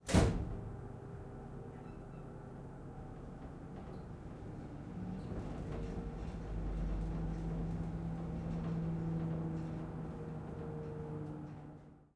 The sound of travelling in a typical elevator. Recorded in an apartment building in Caloundra with the Zoom H6 XY module.